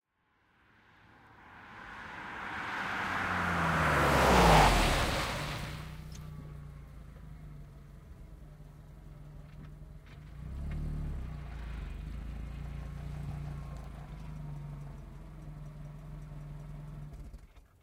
Volkswagen Golf V 1.6 FSI exterior passby with stop stereo ORTF 8040
This sound effect was recorded with high quality sound equipment and comes from a sound library called Volkswagen Golf V 1.6 FSI which is pack of 37 high quality audio files with a total length of 66 minutes. In this library you'll find various engine sounds recorded onboard and from exterior perspectives, along with foley and other sound effects.